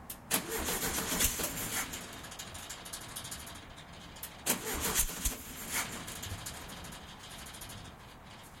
1970 VW Bug Engine Cranking 2
Using a Zoom H2n to record the sound of my 1970 VW Beetle as I started it. It hadn't been started in a couple of months and needed extra cranking.
Bug, Beetle, motor, starter, starting, engine, old, car, VW